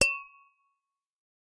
Common tumbler-style drinking glasses being tapped together. Muffled resonance after hit. Close miked with Rode NT-5s in X-Y configuration. Trimmed, DC removed, and normalized to -6 dB.
glass, muffled, tap, tumbler